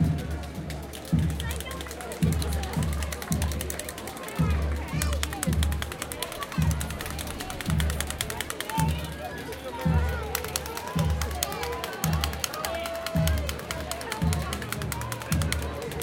Marching band drumming in parade
A marching band in a parade drumming with lots of crowd noise
drum, marching-band, crowd, march, drums, parade, field-recording, marching, people